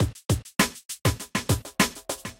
A great DnB beat.